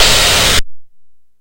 Yet another clank sound.